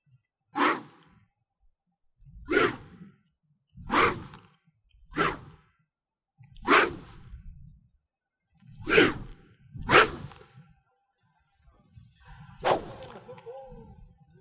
Diferent rodes whooshing the air
swoosh, swosh, whoosh